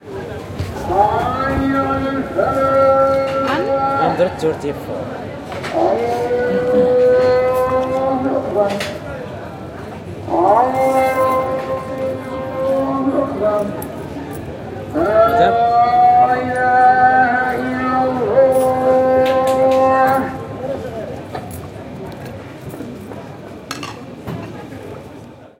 Muezzin Marrakech 1
Muezzin in Marrakech calling for prayer
Atmosphere, el, Fna, Jamaa, Marokko, Marrakech, Public, Travel